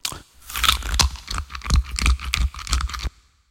cartoon style bite and chew sound